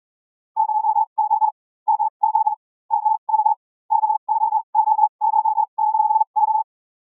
Space sounds created with coagula using original bitmap images. Morse code check it out.
ambient, code, morse, space, synth